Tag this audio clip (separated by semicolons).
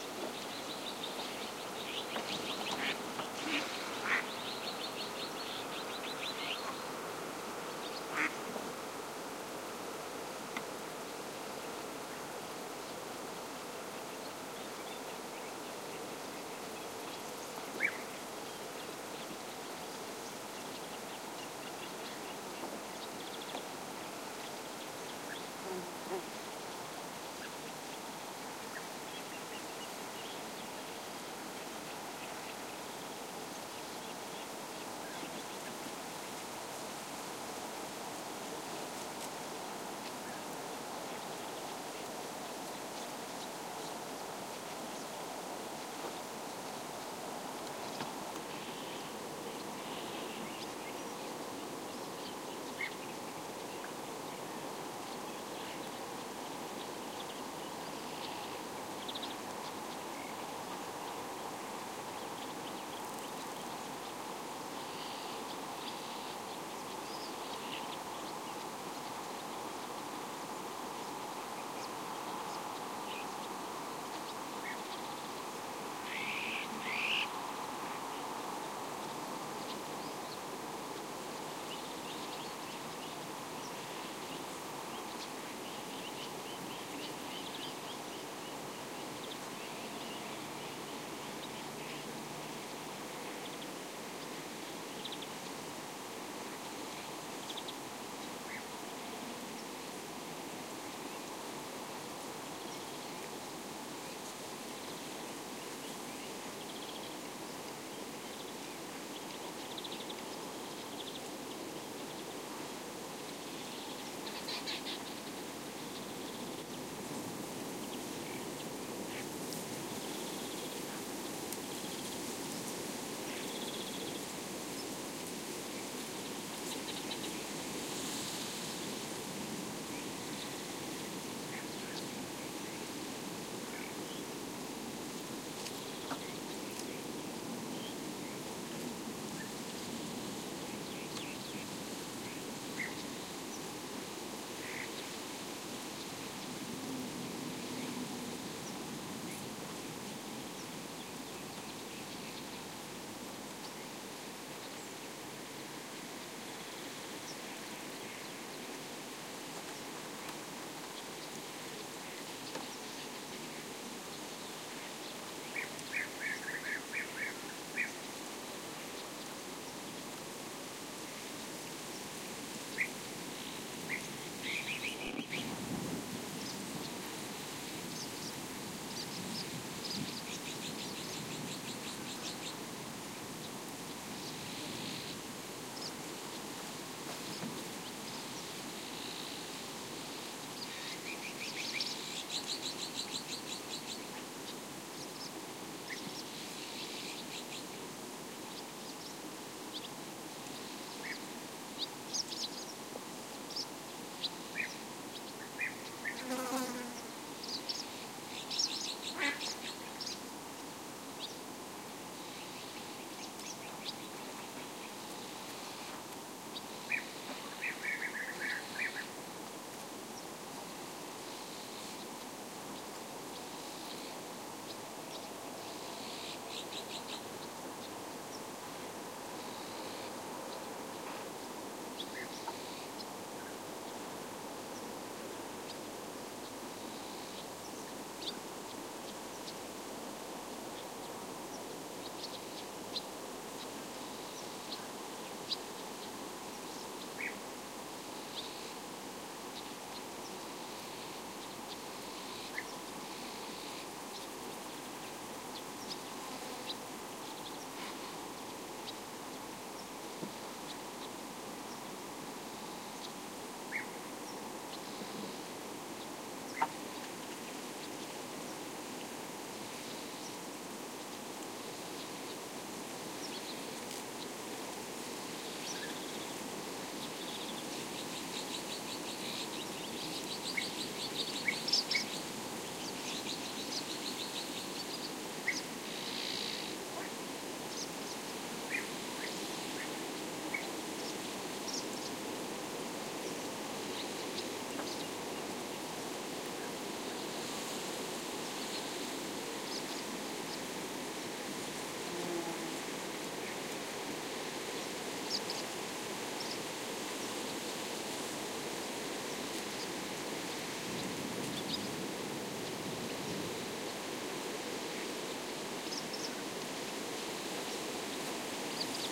birds; Donana; field-recording; Mediterranean; scrub; Spain; spring; wind